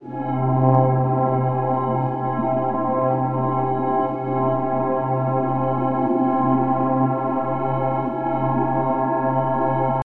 in space pad (120 bpm)
an wobbly pad with a moving sound.made in ableton